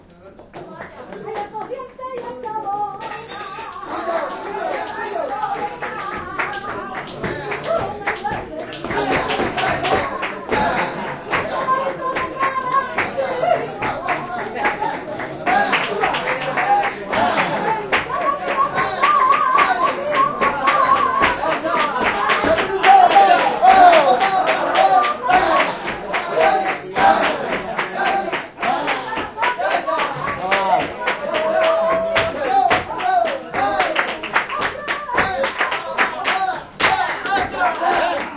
improvised flamenco party ('juerga') in Seville, Spain. Edirol R09 internal mics